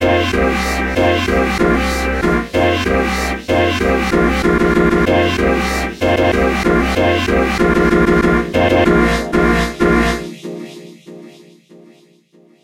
hip hop18 95PBM
dance, hip-hop, music, pattern, sound, pbm, sample, loop, mix, broadcast, interlude, stereo, disco, drop, move, instrumental, intro, radio, club, jingle, trailer, chord, beat, part, background, dancing, stabs, rap, podcast